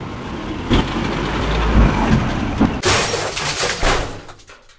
Sound of a truck grinding through some gears, then crashing.
Source audio from Cameron Johnson and SageTyrtle: